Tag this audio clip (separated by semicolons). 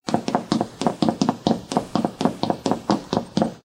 high-heels; MUS152; running